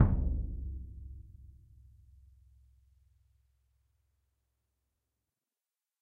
Symphonic Concert Bass Drum Vel19
Ludwig 40'' x 18'' suspended concert bass drum, recorded via overhead mics in multiple velocities.
orchestral, bass, concert, symphonic, drum